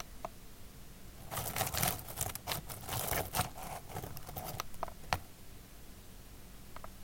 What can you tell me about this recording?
Short potpourris rustling sound made by stirring a bowl of it
crackle, crunch, potpourris, rustle, scrunch